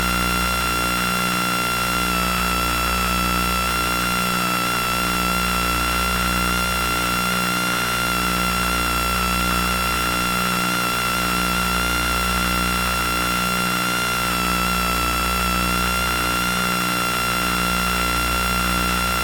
wireless Game controller
Playstation 2 wireless controller at point blank range recorded with old phone pickup microphone.
interference, radio